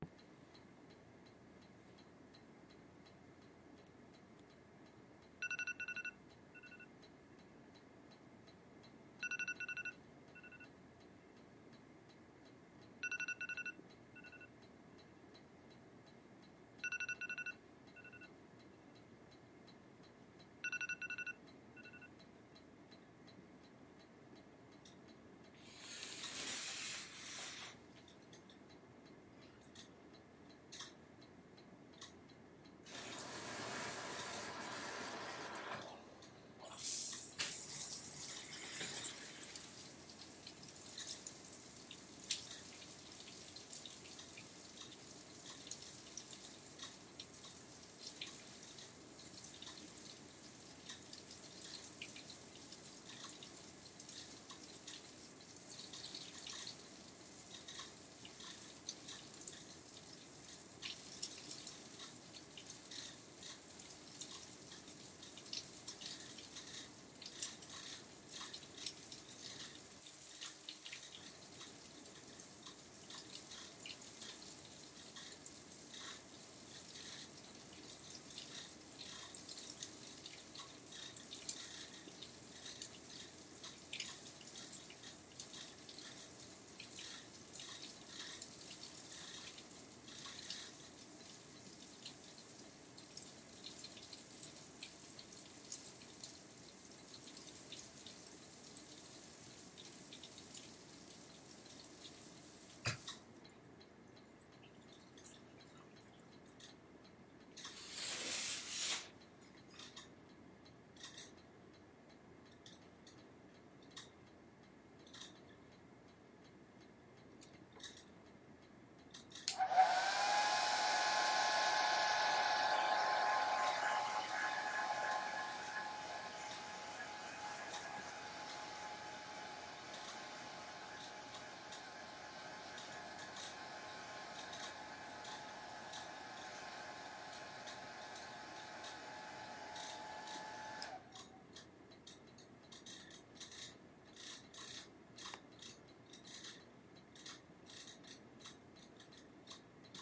The sounds of my morning routine.